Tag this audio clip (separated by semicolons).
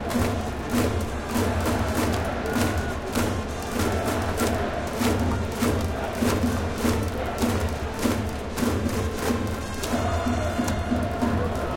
Ambient; Baseball; Crowd; Soundscape